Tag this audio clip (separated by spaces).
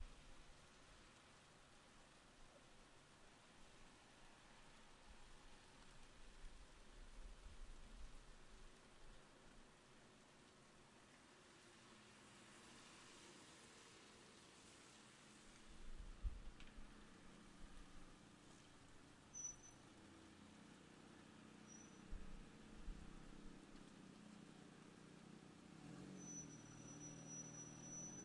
Storm day Weather rainy Rain